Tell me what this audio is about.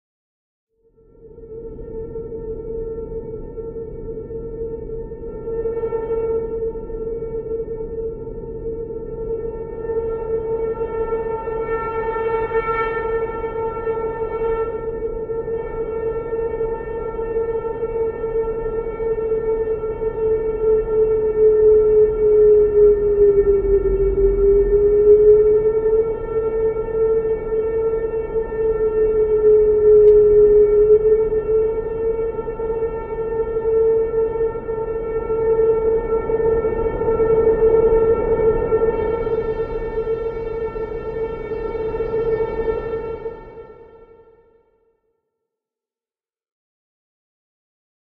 Horror Drone w/Feedback
Ambient, Atmosphere, Dark, Drone, Feedback, Horror